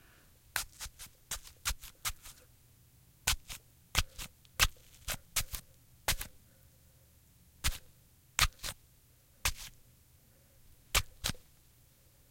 stabbing an apple with a knife
apple, cut, fruit, stabs, hit, stab, knife